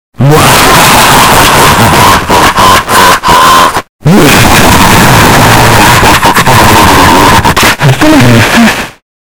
LOUD Noisy Evil Laughter
I took two laughter tracks that had clicks in them and applied click removal and leveling until it was painful to listen.
Recorded with a Zoom H2. Edited with Audacity.
Plaintext:
HTML:
annoying, horror, laughing, laughter, loud, noisy, painful